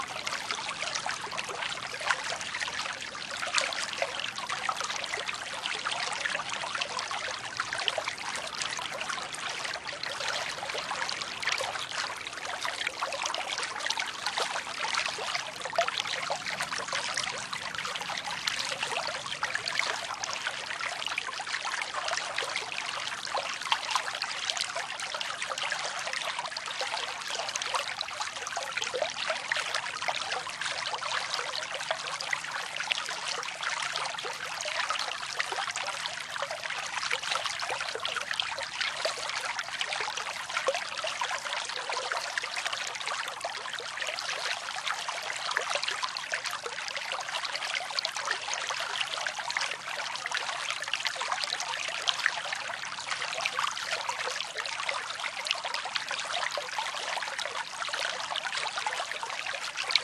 Small Forest Brook 02
Audio from a small forest brook near Grafing in Bavaria, Germany.
Recorded with a directional microphone, aimed directly at a turbulence, from about 30 cm (~12 inch) away.
If you'd like to tell me what you used it for, go ahead, I'd love to hear it.